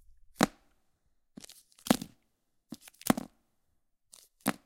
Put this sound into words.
candy bar drop
Picking up a dropping a snickers candy bar onto a wooden surface a bunch of times.